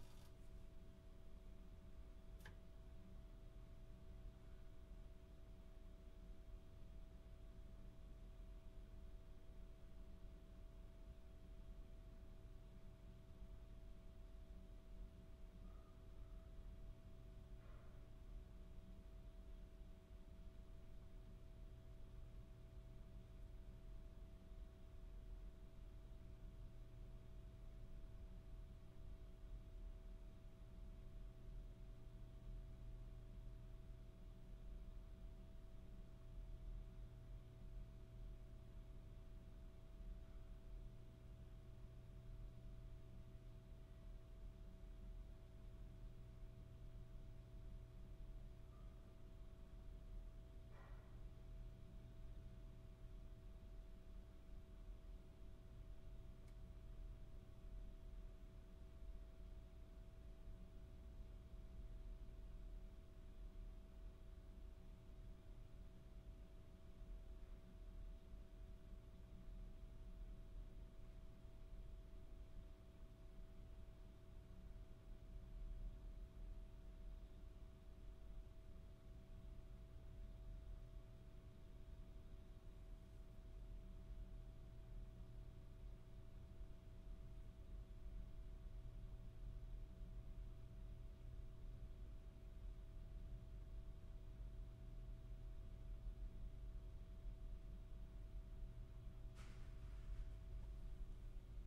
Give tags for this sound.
quiet
room

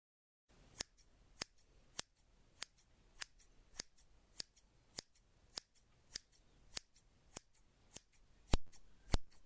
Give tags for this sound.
Cut
Paper
Scissors

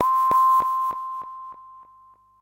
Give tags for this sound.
synth
multi-sample
100bpm
waldorf
electronic

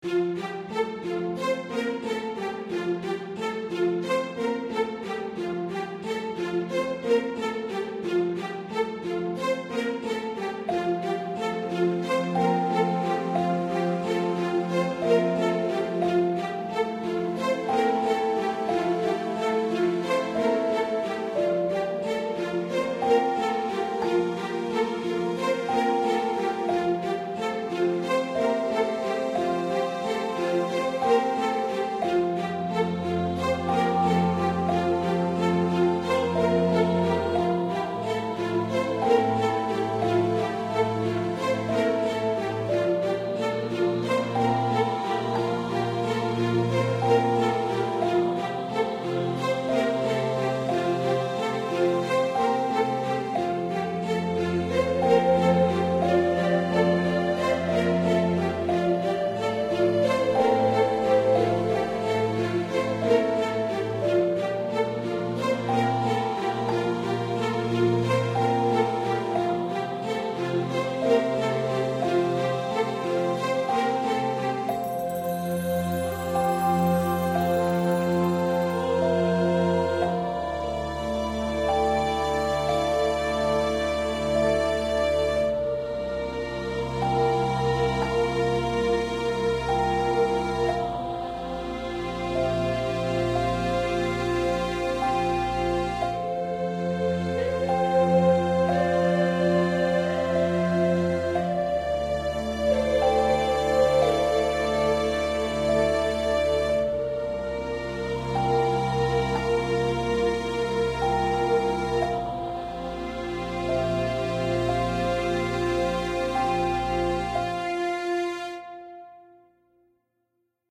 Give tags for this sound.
native
flute
choir
voice
choral
singing
electronic
instruments
cinematic
neo-classical
experimental
music
first-nations
software
ethnic
voices
sci-fi
classical

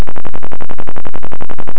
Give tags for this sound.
deconstruction
glitch
lo-fi
loud
noise

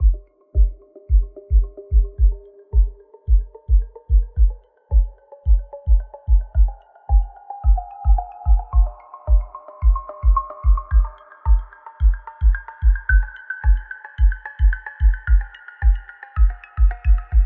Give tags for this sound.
hop
loop
kick
hip

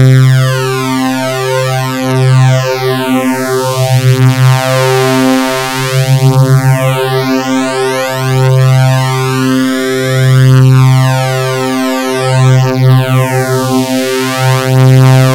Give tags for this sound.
reese
saw

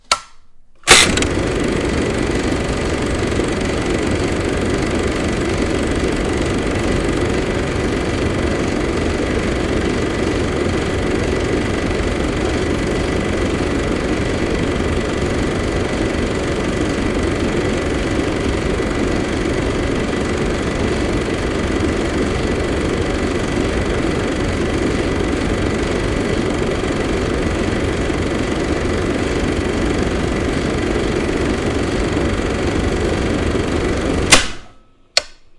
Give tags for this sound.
projector
motor
film

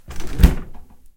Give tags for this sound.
refrigerator
opening
closing
close
ice-box
open

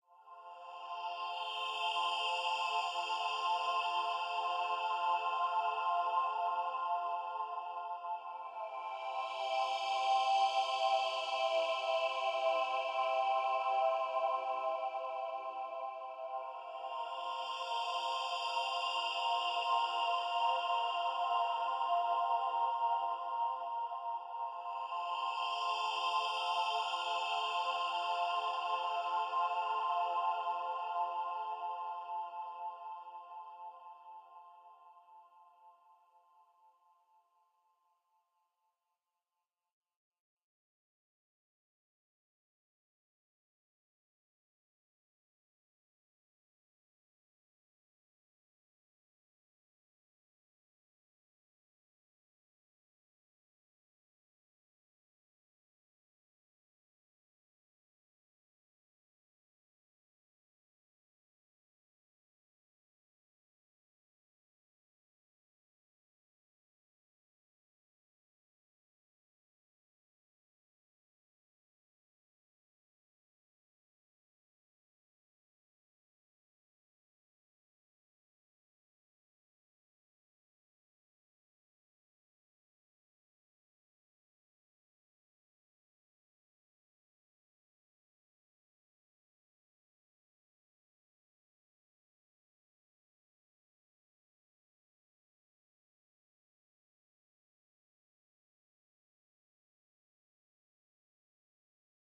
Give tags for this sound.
chillout
hold-music
lounge
relaxing

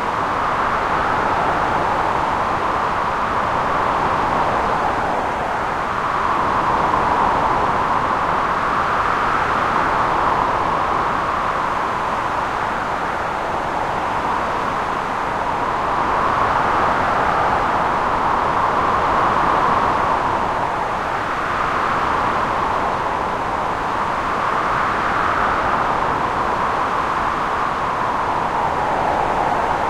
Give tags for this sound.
flow
spatial
noise
modulation

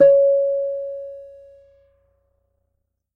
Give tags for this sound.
guitar
notes
nylon
string

